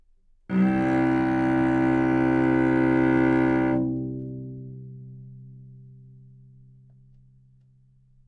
Cello - C2 - other
Part of the Good-sounds dataset of monophonic instrumental sounds.
instrument::cello
note::C
octave::2
midi note::24
good-sounds-id::234
dynamic_level::f
Recorded for experimental purposes
good-sounds, multisample, cello, single-note, C2, neumann-U87